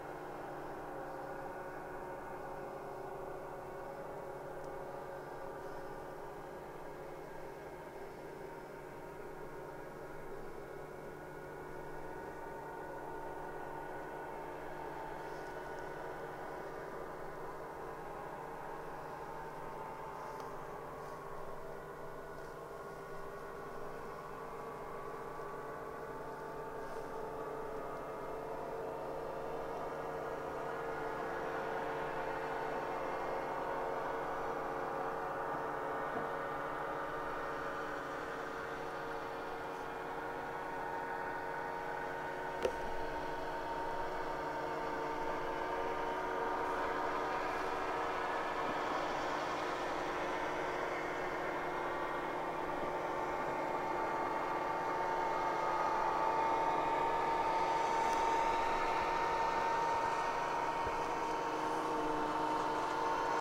snowmobiles pass by long line convoy ghostly distant far